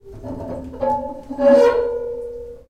Rubbing a wet nickel grate in my shower, recorded with a Zoom H2 using the internal mics.